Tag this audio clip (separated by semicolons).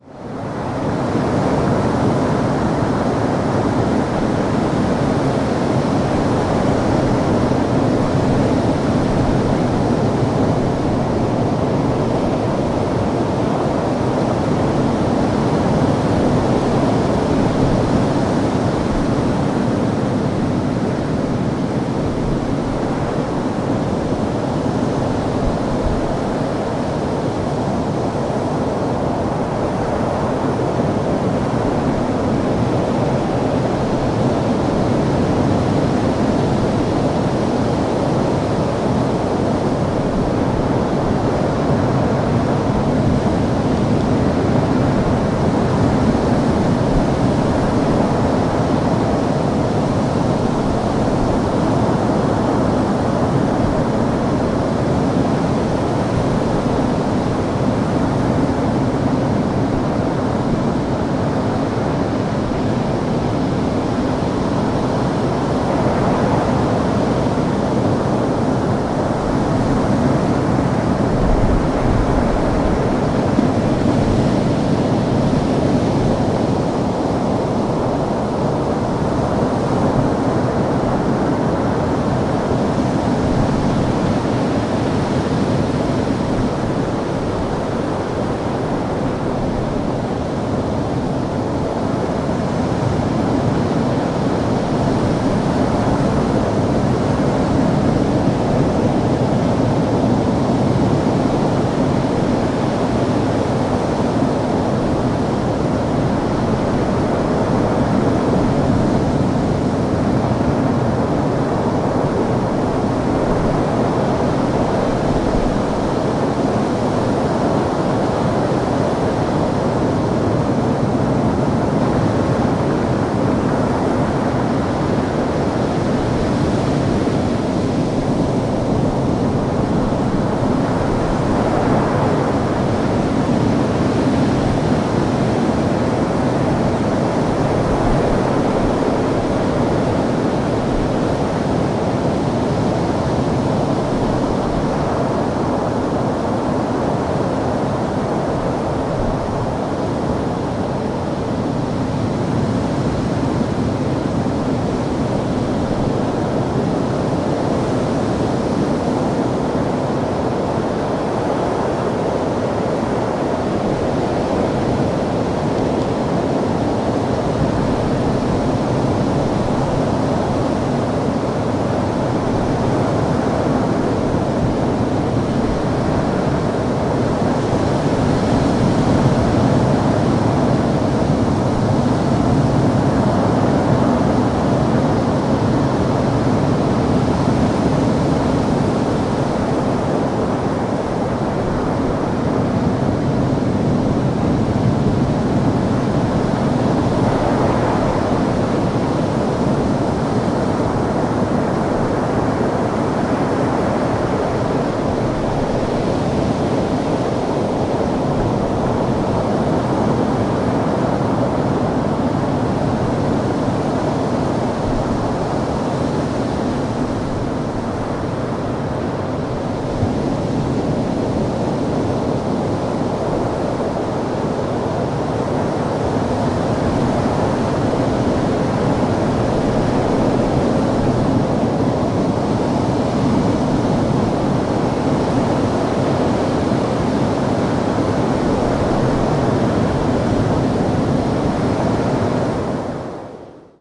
waves; field-recording; sea; beach